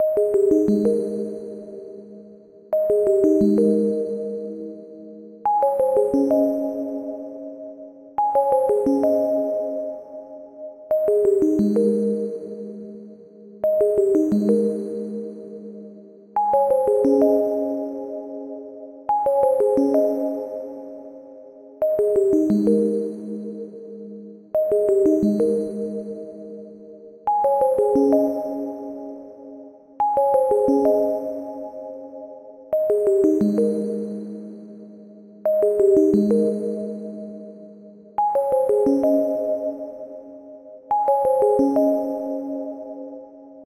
Pleasant pluck with reverb
88-bpm, loop, melodic, pleasant, pluck, sinus